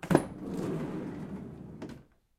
Van sliding door open
Opening a sliding door. Recorded with a Zoom H5 and a XYH-5 stereo mic.
car, door, open, slide, sliding